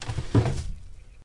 taken from a random sampled tour of my kitchen with a microphone.